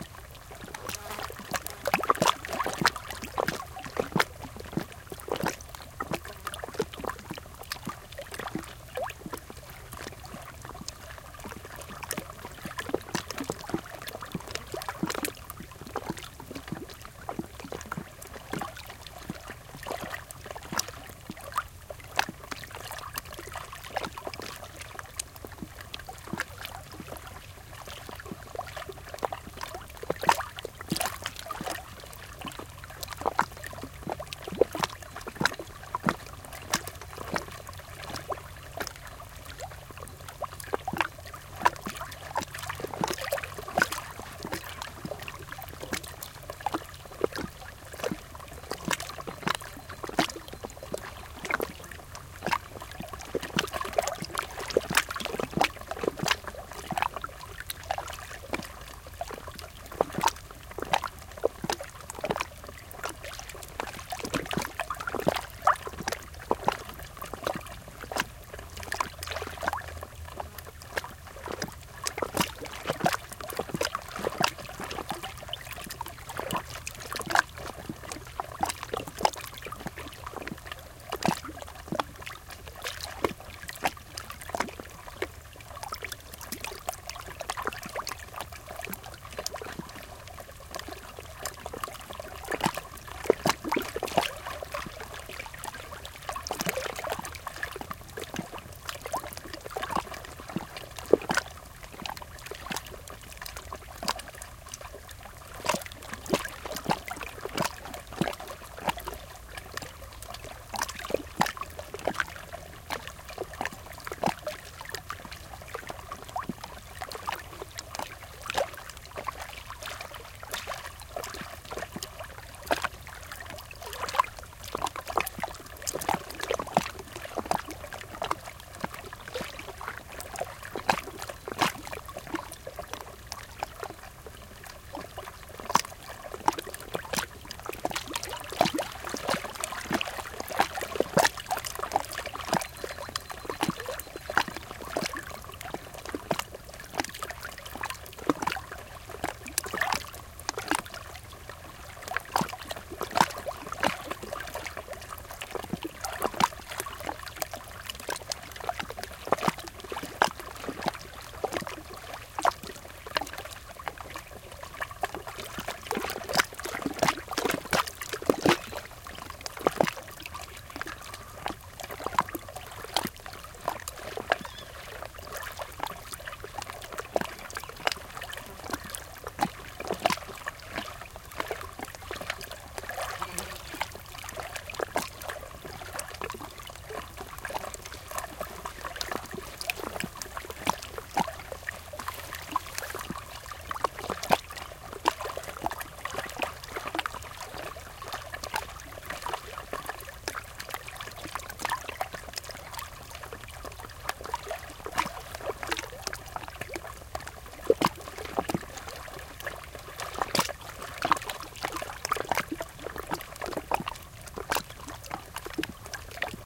waves splashing softly, some insect buzzs and bird calls. High-pass filtered. Recorded at Ensenada de la Paz, Baja California Sur, Mexico, with two Shure WL183, Fel preamp, and Olympus LS10 recorder
ambiance, field-recording, mexico, nature, splash, water